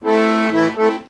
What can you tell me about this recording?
Accordeon harmonic phrase (short). Recorded with binaural mics + CoreSound 2496 + iRivier H140, from 1m distance.
accordion, chord, drama, harmonic, keys, minor, organ, phrase, short